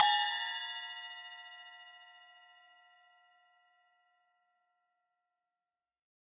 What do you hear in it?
SC08inZilEFX1-Bl-v08
A 1-shot sample taken of an 8-inch diameter Zildjian EFX#1 Bell/Splash cymbal, recorded with an MXL 603 close-mic and two Peavey electret condenser microphones in an XY pair.
Notes for samples in this pack:
Playing style:
Bl = Bell Strike
Bw = Bow Strike
Ed = Edge Strike
multisample, cymbal, 1-shot, velocity